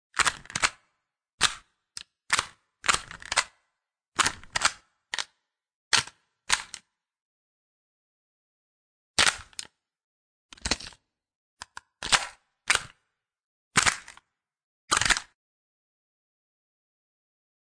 Gun Cocking ! [Reload]
cocking, reloadGun, Magazine, effectsGun, ReloadClean, Sound, Jammed, Gun